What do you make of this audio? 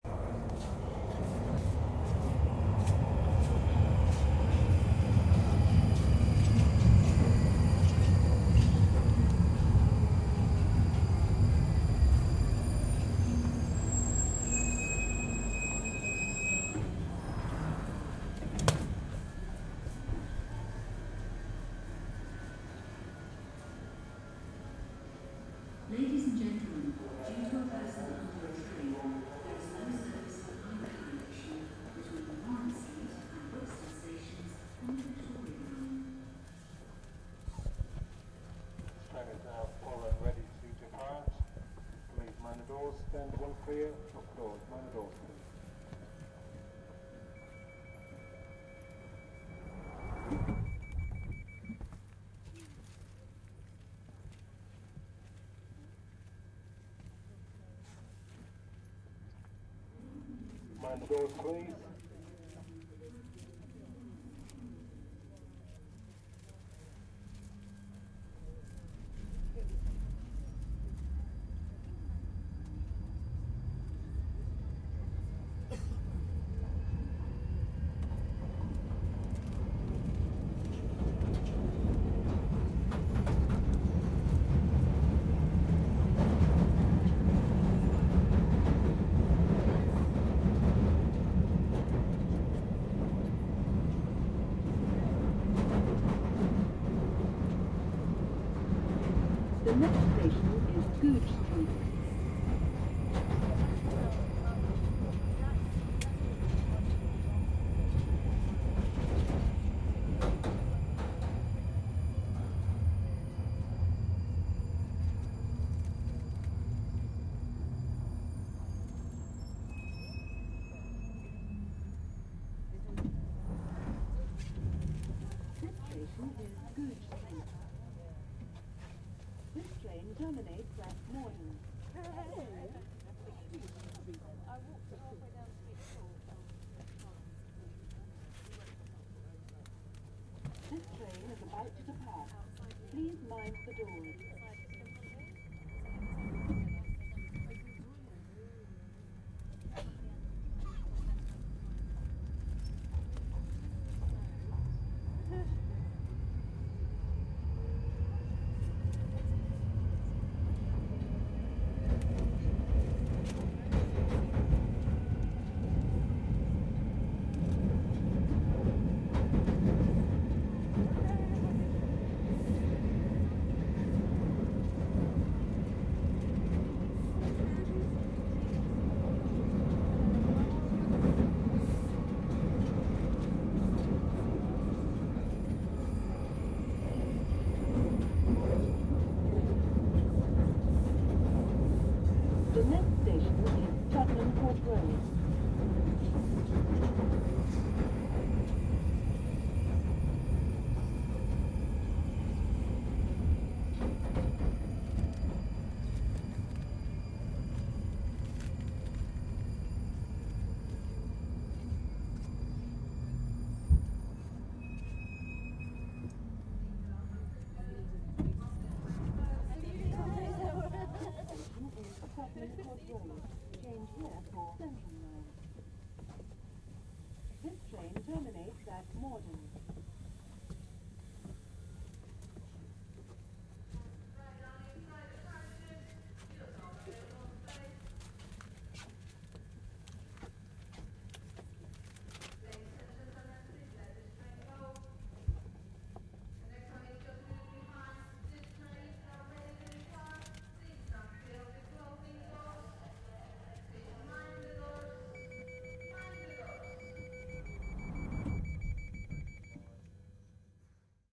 Tube - northern line
Travelling south on northern line. Busy but quiet carriage, can hear announcements about victoria line closures due to person under train.